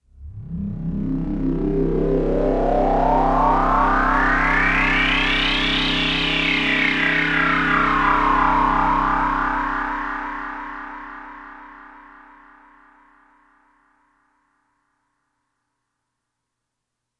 Dystopian Future - FX Sounds (7)
The Dystopian Future - Sound Effects Pack
The dystopian future effects pack is sampled from various synthesisers inspired by the cartoon animation series samurai jack .
These samples & loops are designed to work in a range of movie editing software and daw programs contain a selection sounds that are guaranteed to bring life and character to any media production.
They can be imported directly into your DAW or program of choice or can be loaded into any software. You’re welcome to use the sounds in your project in any way you like.
The sound effects are supplied in a zip file, so you’ll need to extract them before you can see them.
You can use them in your music, as well as audio and video projects.
Official site :
acoustic, alien, ambiance, ambience, ambient, analog, application, atmosphere, background, cinematic, effect, effects, future, futuristic, fx, space, spacecraft, synth, ufo